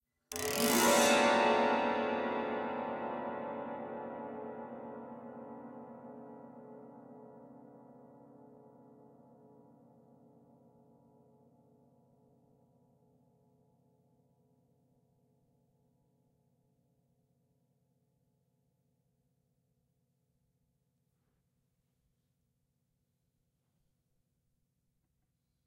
AutoHarp Chord Sweep All 01
Using my fingers, I did a sweep up the chromatic scale on this autoharp. Gives a classic creepy sound. Recorded with an Aphex 207D and a Neumann TLM 103.
All, AutoHarp, Chord, Chromatic, Classic, Creepy, Hit, Horror, Instrument, Musical, Notes, Scale, Scare, Scary, SFX, Strings, Suspense, Sweep, Up